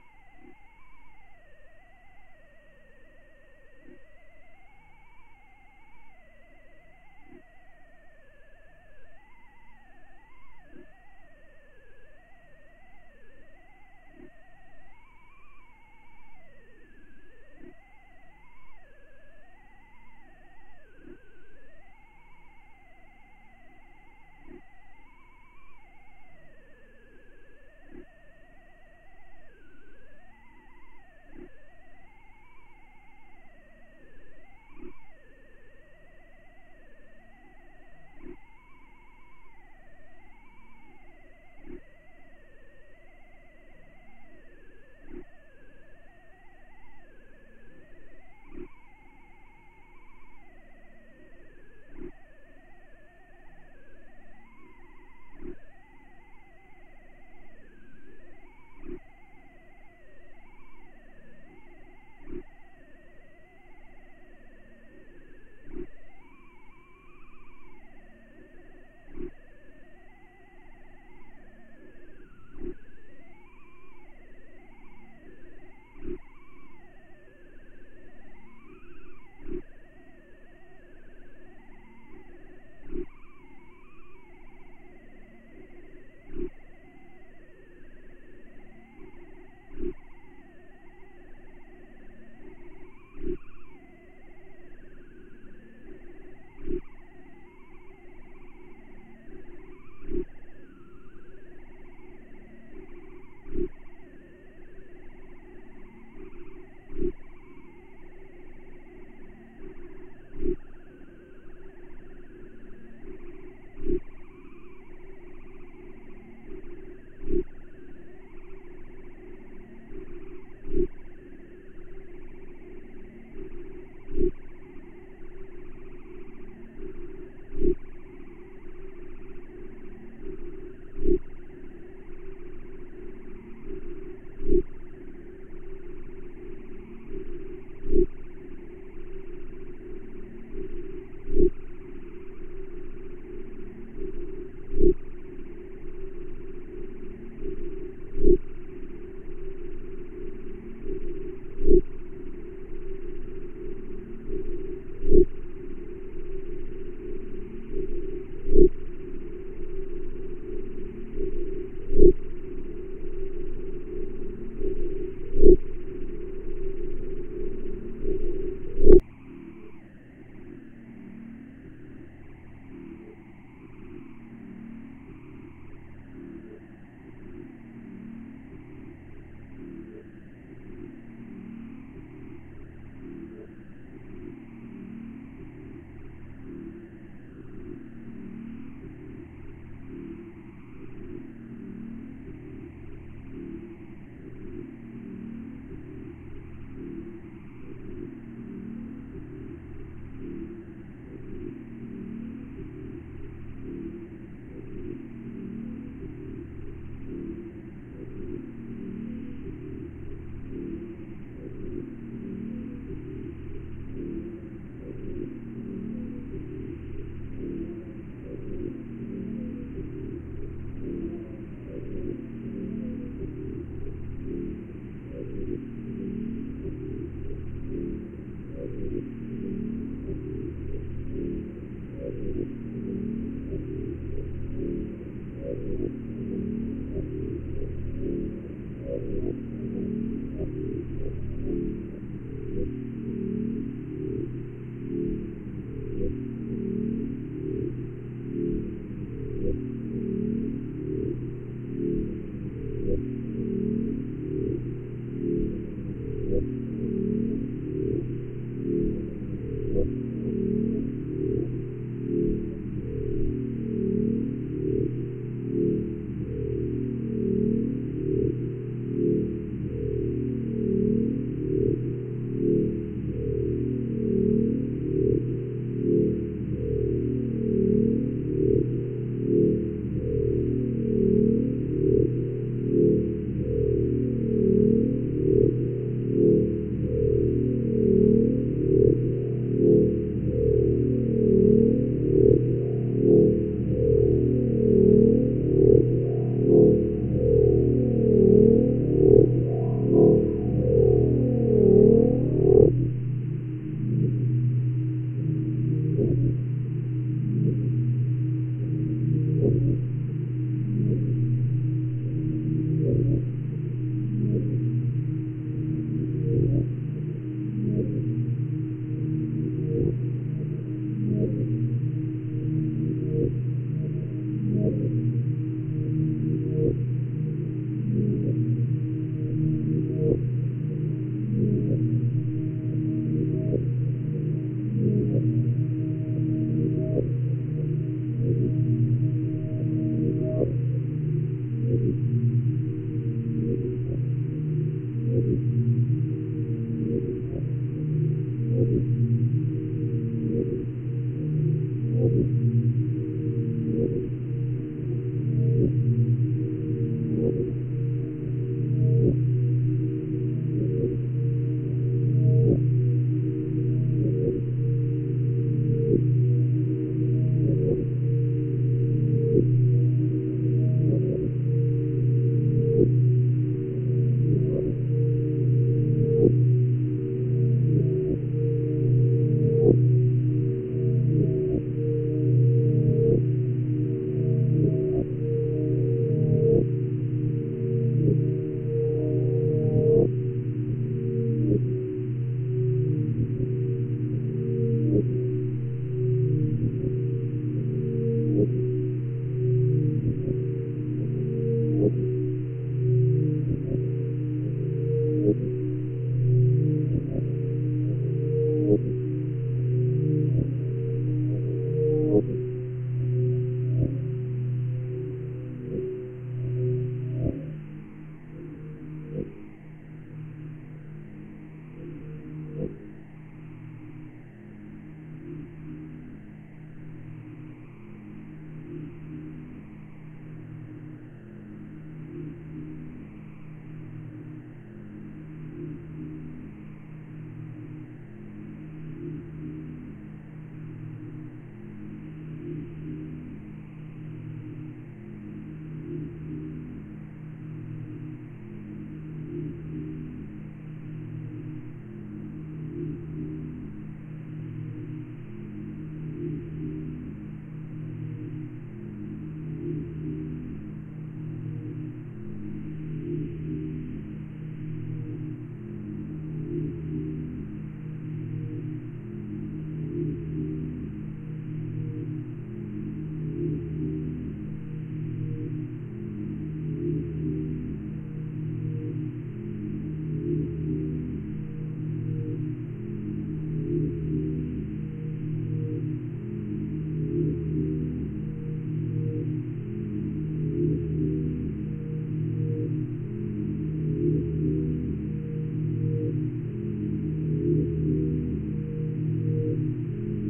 unkonwn transmission1
radar, radio, telecommunication, garbled